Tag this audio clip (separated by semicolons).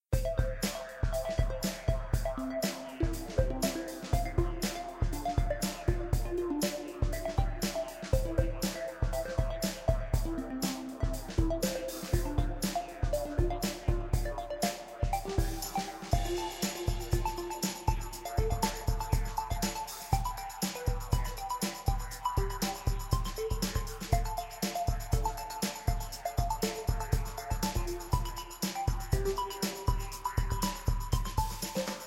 upbeat; funky; loop; groovy; happy